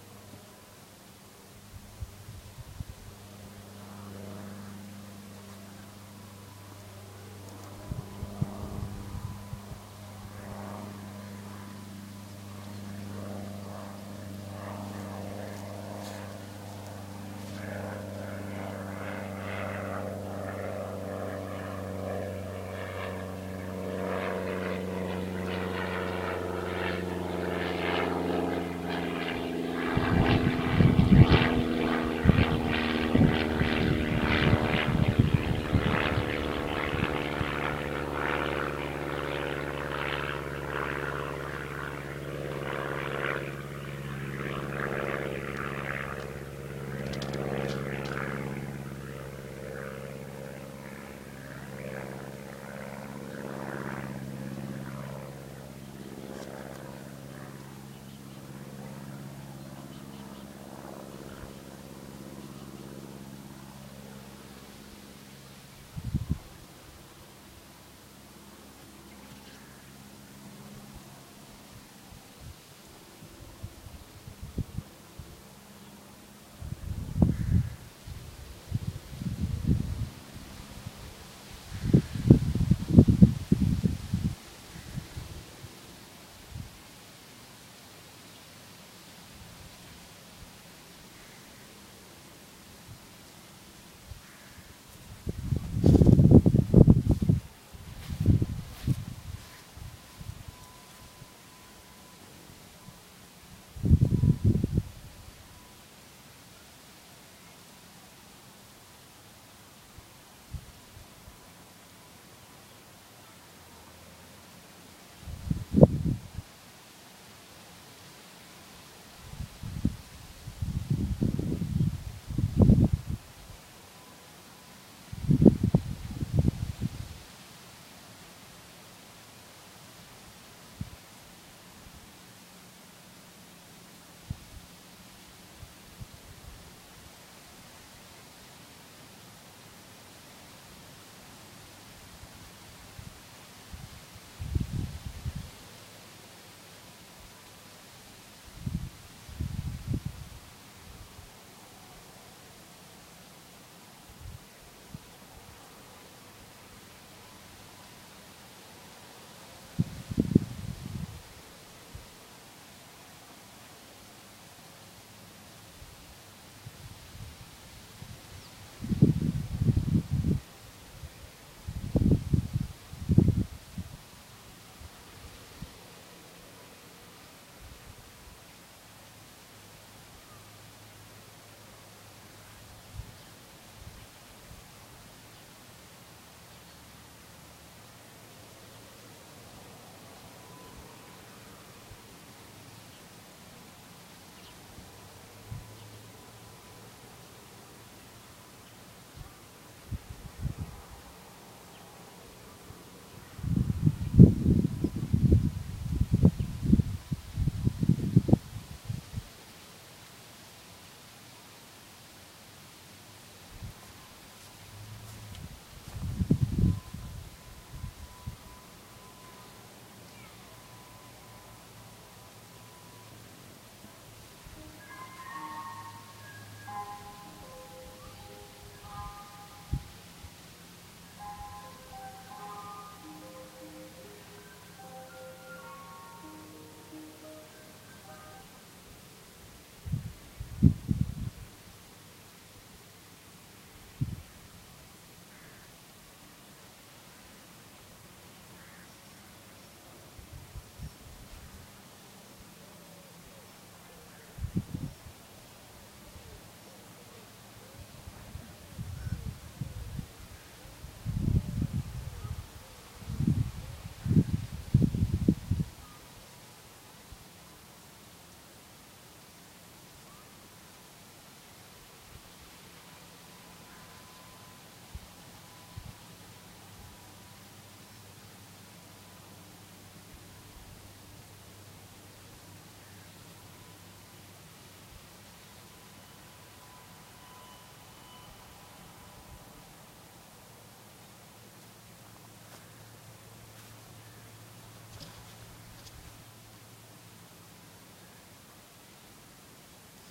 29072014 campsite afternoon
Afternoon at a campsite in Nottinghamshire. There's light wind, a plane, birds, maybe sme water noises (this sound was recorded next to the River Trent- see geotag) and even an icecream van.
aeroplane, afternoon, airplane, ambience, ice, icecream-van, jingle, river, water